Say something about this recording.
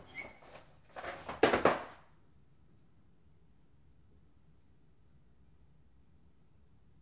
Mail in the mailslot
Mail through the mail slot.